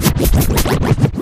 92bpm QLD-SKQQL Scratchin Like The Koala - 004 yeah right
turntable, hardcore, dj, djing, scratch, loop, cut, beat, scratching, turntablism, old-school, record-scratch